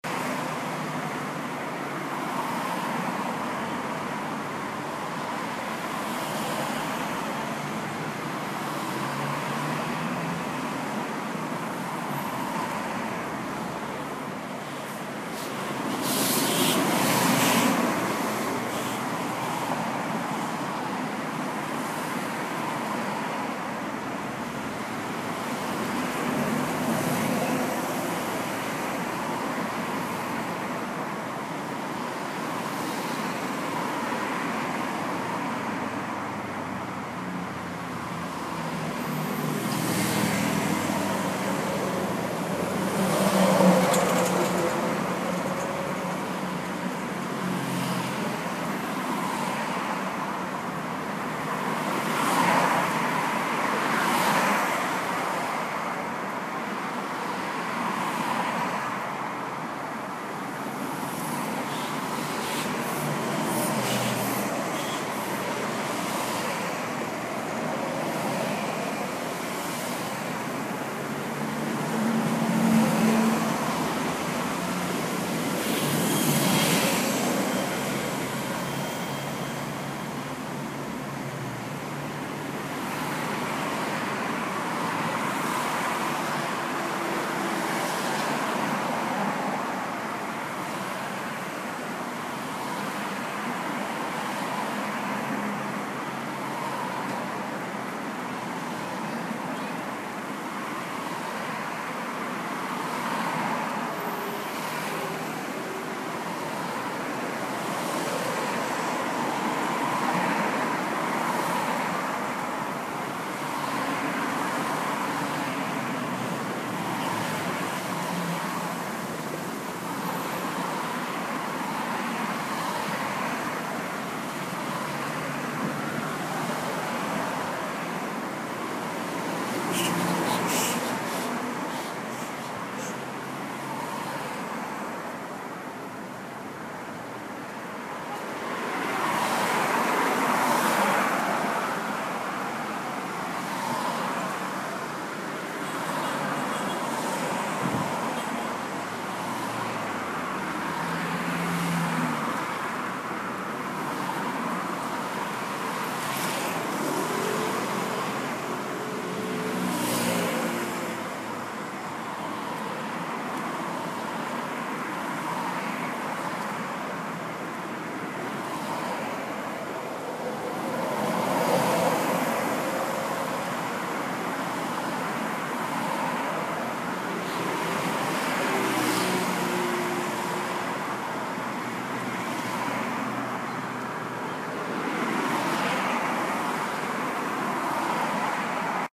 highway cars fast on road